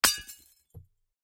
Common tumbler-style drinking glass being broken with a ball peen hammer. Close miked with Rode NT-5s in X-Y configuration.
break, glass, hammer, shatter, tumbler